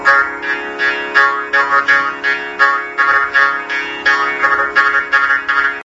Morsing theka
This is a short theka played on the Morsing, which is a jaw harp used as a percussion accompaniment in Carnatic Music
adi-tala, CompMusic, Morsing, Carnatic-music, Jaw-harp, theka, Carnatic-percussion